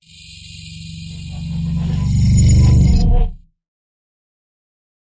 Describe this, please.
radio shudders13x
grm-tools, radio, shudder, sound-effect